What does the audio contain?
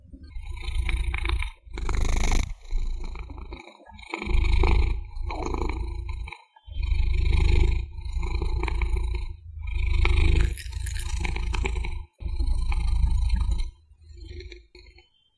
Cat purr domestic happy glad
Recording device: Roland R-26 portable digital recorder.
Microphone: Built-in directional XY stereo microphone.
Edited in: Adobe Audition (adjusted gain slightly, for a good signal level).
Date and location: April 2016, field-recording, Sweden.
murmur, vibration, happy, cats, 20-hz, low-sound, purrer, hum